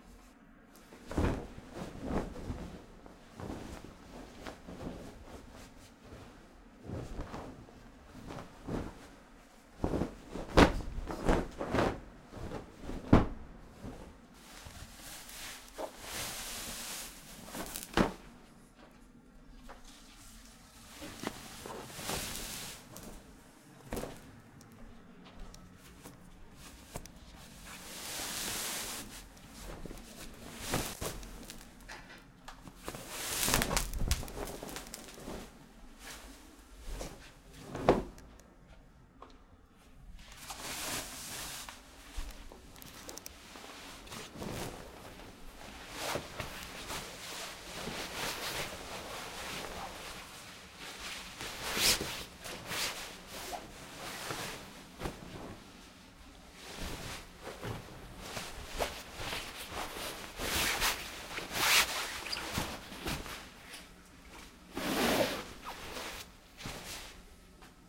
bed-making
home, sheets, tuck, making-bed, flutter